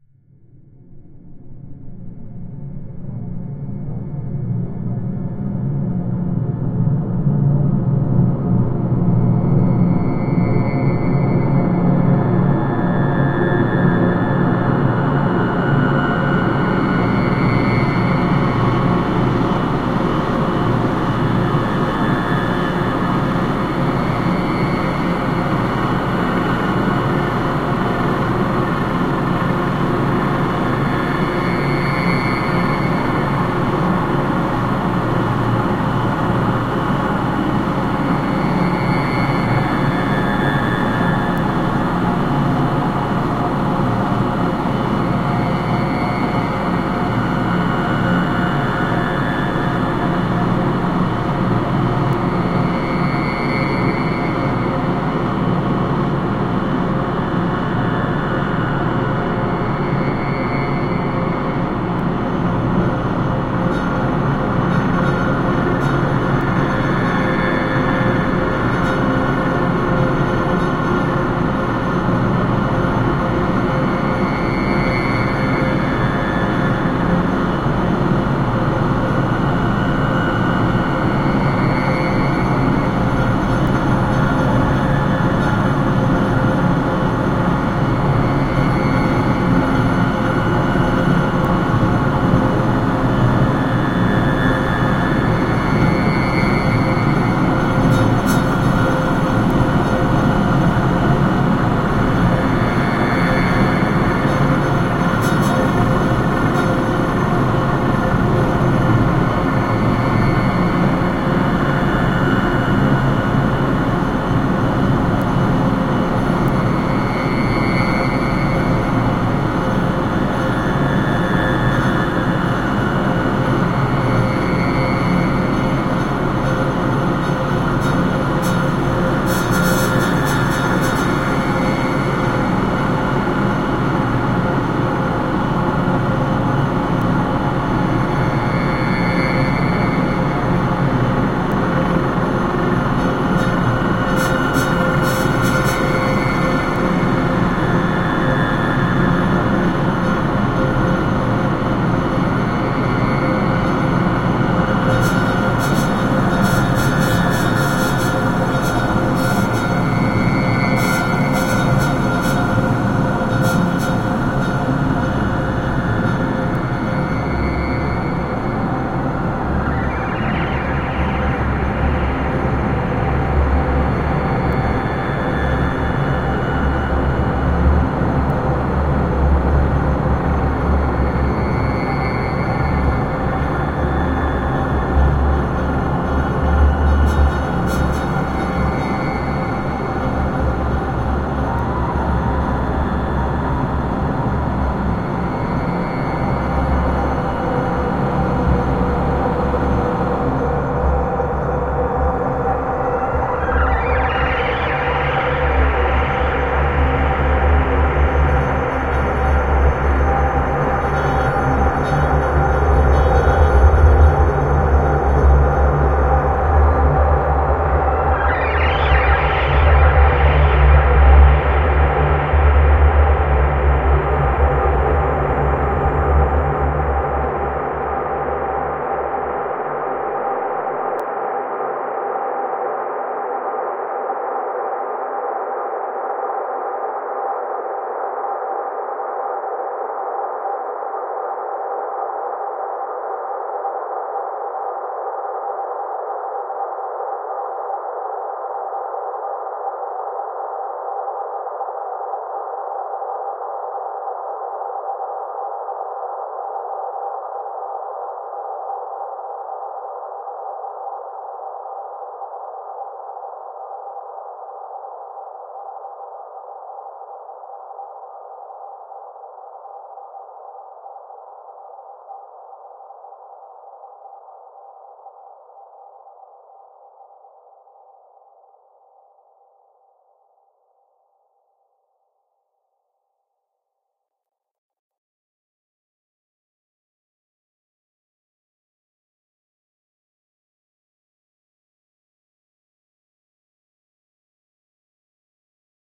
Drones that are most alien like.